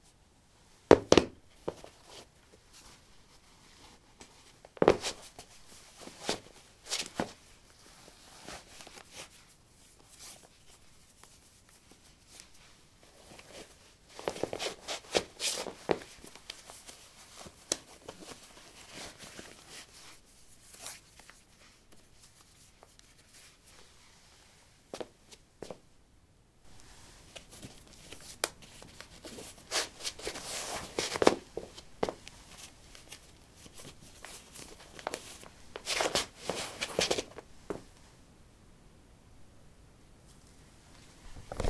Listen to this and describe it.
lino 11d sneakers onoff
Putting sneakers on/off on linoleum. Recorded with a ZOOM H2 in a basement of a house, normalized with Audacity.